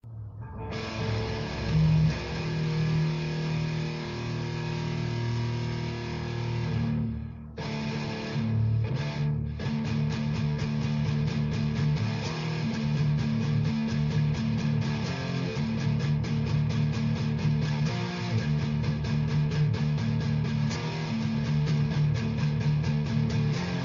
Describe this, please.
I am creator of this piece. Me playing on my Gibson Les Paul electric guitar. I made another version that can be looped search badazzloop.